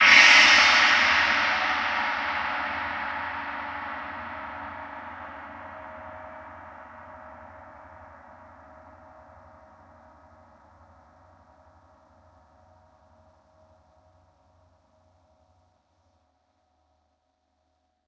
Orchestral Concert TamTam Gong 15
Multi velocity recording of a full-size 28" orchestral symphonic concert Tam-Tam gong. Struck with a medium soft felt mallet and captured in stereo via overhead microphones. Played in 15 variations between pianissimo and fortissimo. Enjoy! Feedback encouraged and welcome.
cymbal, percussion, orchestral, stereo, gong, symphonic